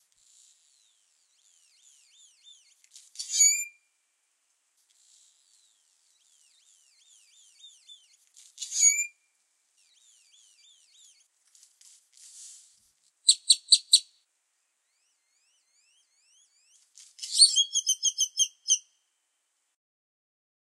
Bird Chirps
This is the recording of an unknown bird singing mating calls.
Effects: noise removal, basic EQ to clean it up
bird, birds, birdsong, chirp, chirping, field-recording, nature, raw, tweet